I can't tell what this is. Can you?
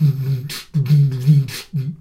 Loop2 010 Nasal (120bpm)
I recorded myself beatboxing with my Zoom H1 in my bathroom (for extra bass)
This is a nasal beat at 120bpm.
120bpm, aggressive, bassy, beatbox, boomy, Dare-19, loop, nasal, percussion, rhythm, rhythmic